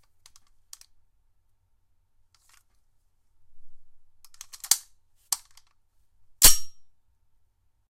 Another reload of the beretta m9. recorded with a non-filtered condenser mic.